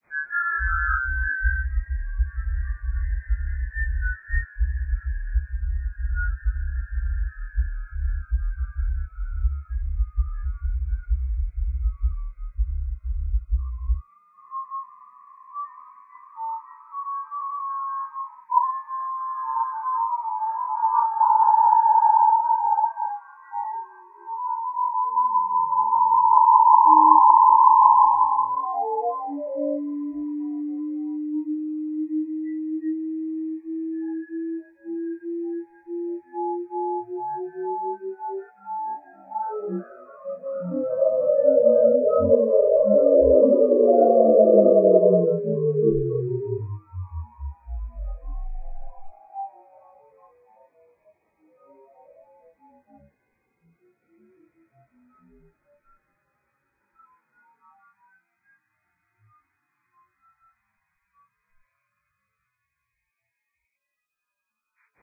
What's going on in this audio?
Bitmaps & wavs Experiment
An experiment I had using a picture of my uncle using a program (in the name) that converts bitmaps into wavs and visa versa.
weird, electric